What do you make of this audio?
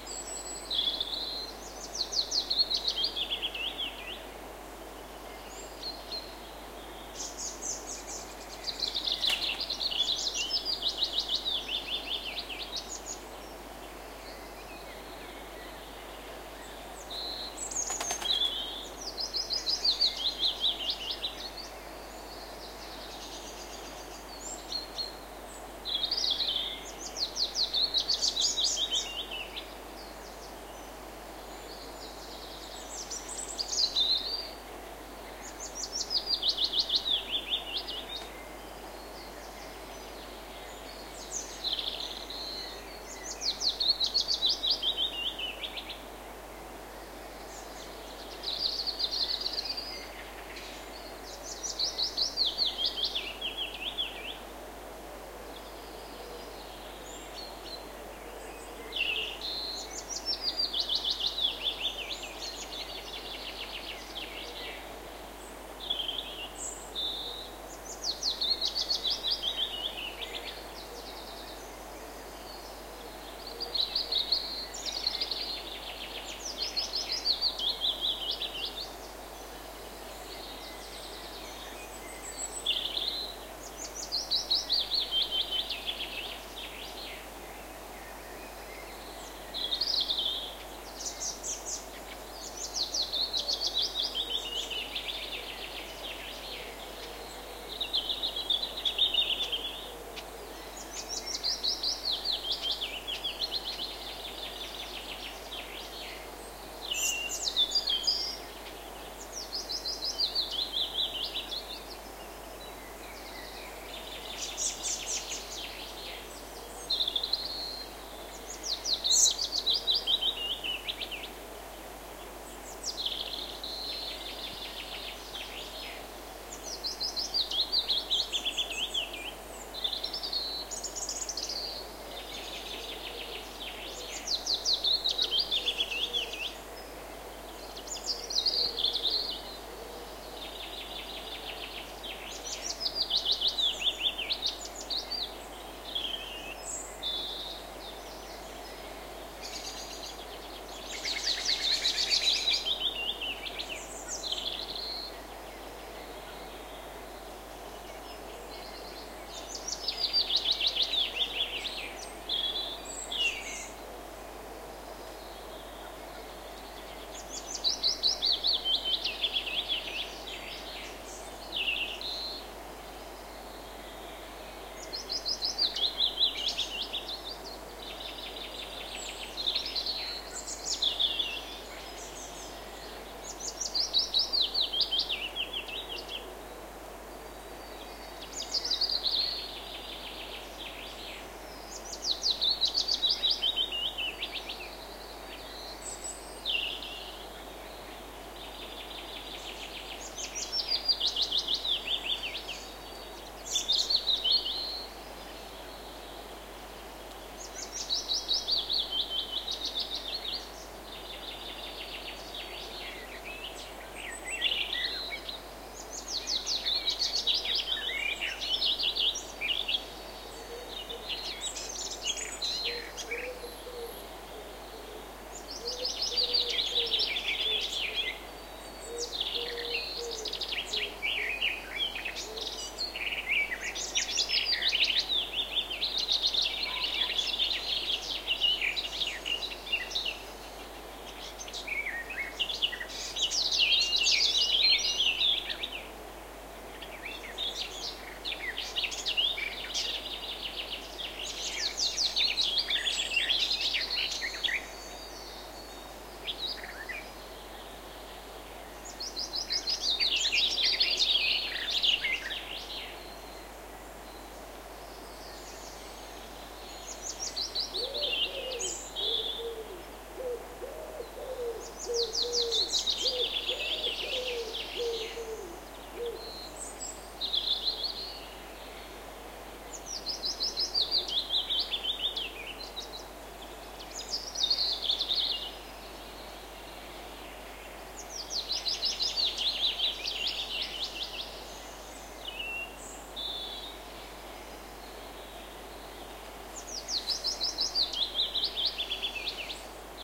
scottish morning 08
This recording was done on the 31st of May 1999 on Drummond Hill, Perthshire, Scotland, starting at 4 am, using the Sennheiser MKE 66 plus a Sony TCD-D7 DAT recorder with the SBM-1 device.
It was a sunny morning.
This is track 8.
If you download all of these tracks in the right order, you are able to burn a very relaxing CD.
birds, morning, birdsong, scotland, dawnchorus, countryside, nature, field-recording